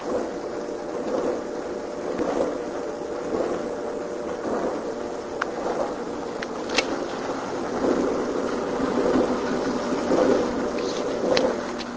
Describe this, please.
This is a dryer.